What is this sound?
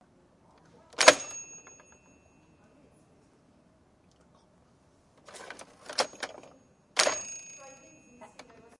mySound GWECH DPhotographyClass cashkids
academy, world